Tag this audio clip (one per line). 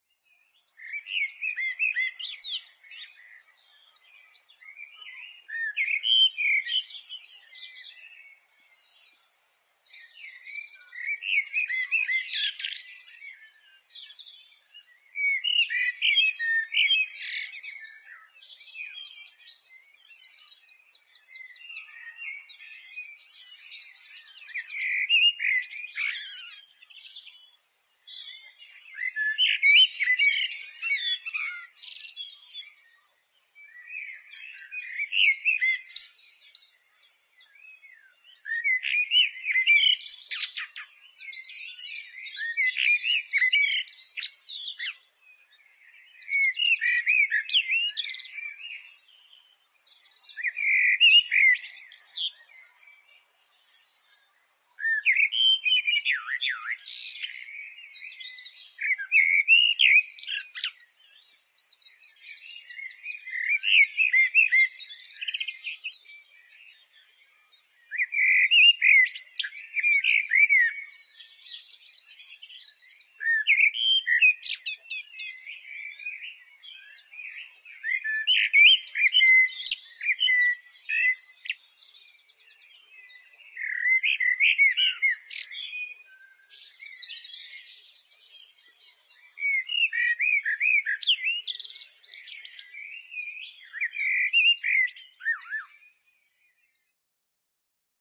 bird
birds
birdsong
nature
sing
spring
tweet